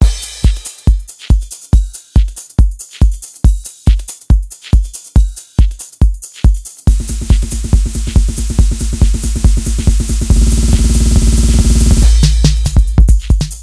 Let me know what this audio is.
crescendo drum loop 140bpm
loop, edm, roll, drum, dance, percussion, trance, drums, beat